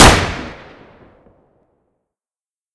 A Submachine Gun firing SFX. Created with Audacity.